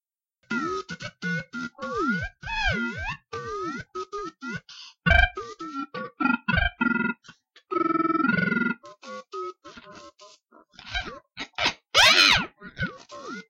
Robot sound effects.